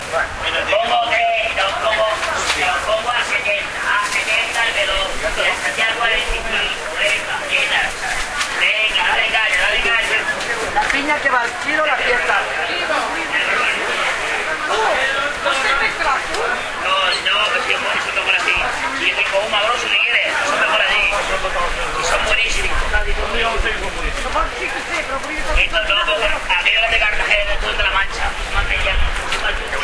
This sound was recorded with an Olympus WS-550M and describes the ambient of the city in the market, on Saturday.